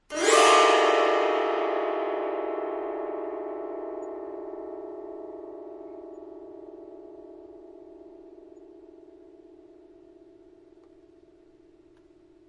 Fretless Zither full gliss
Nice full chromatic gliss on a fretless zither. Great for horror!